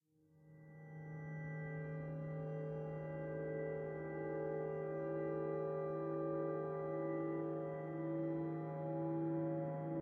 Bell tone with layered strings and background bird call. Fade in and out. Reverb. Delay added to bird call. Deamplified and slightly compressed.